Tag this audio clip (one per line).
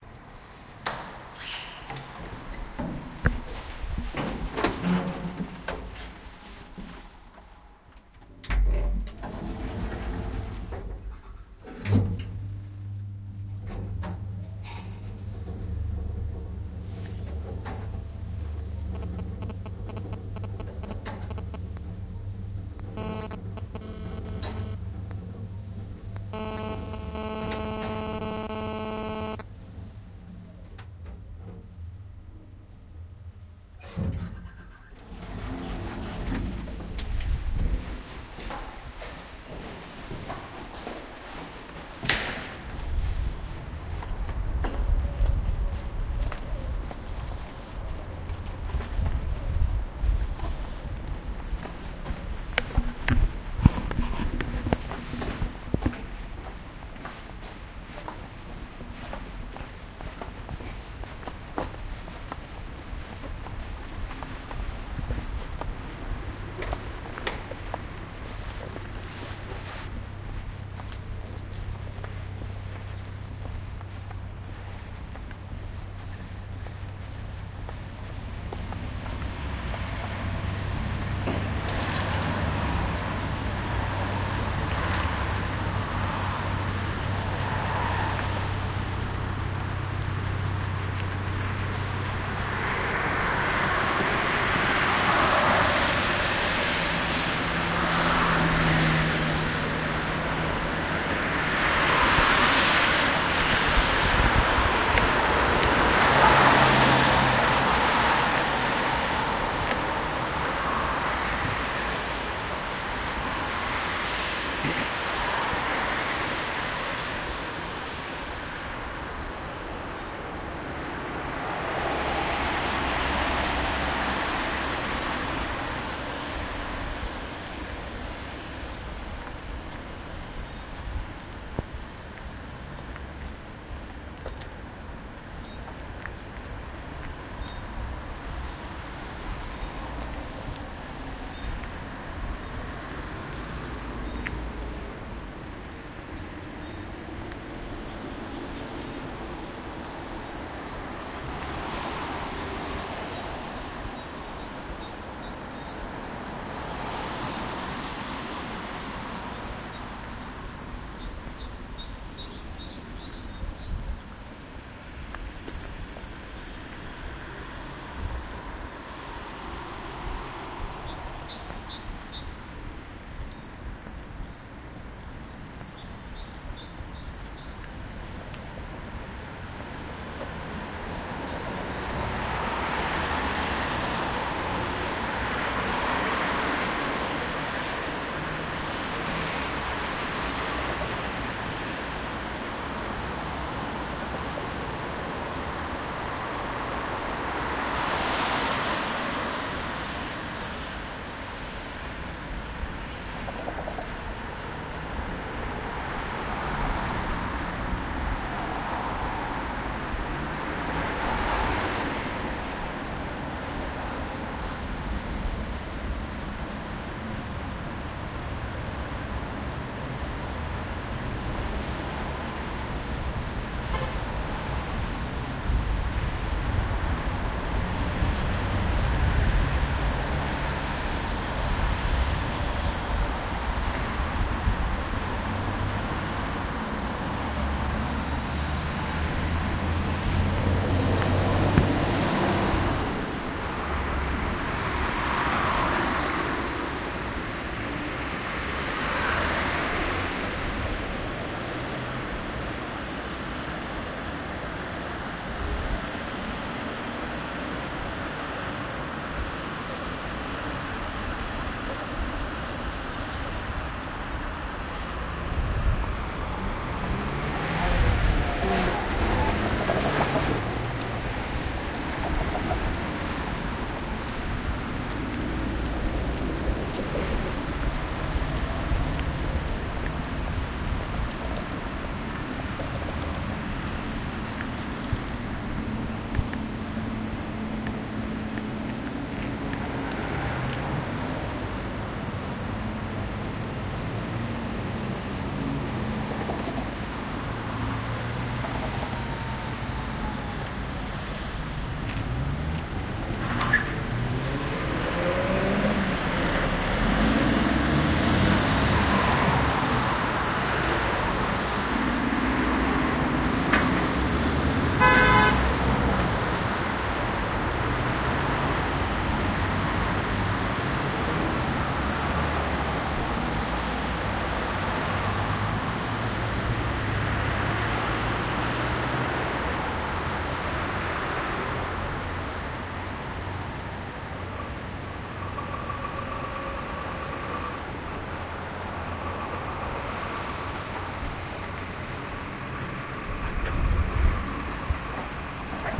ambience
atmo
general-noise
ambiance
traffic
munich
city
field-recording
atmosphere
noise
town
walking
street
ambient
background
tram
car
people
cars
soundscape